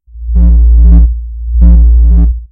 BEGUE Guillaume 2013 2014 scanner1
Scanner sound created with Audacity, 2,2sec
Son sinusoïdal, fréquence 261,6 Hz, Amplitude 0,8
Apply 4x4 pole allpass (freq 1:7000, freq 2:12000, frdq 3:15000, freq 4:20000)
Apply Fade In and Fade Out
Apply Normalize
Apply changer la hauteur de F vers D#/Eb
/// Typologie (P. Schaeffer) :
Contenu varié
/// Morphologie:
Masse: Groupe tonique
Timbre Harmonique: Terne
Grain: Léger grain
chimio, laser, medical, scanner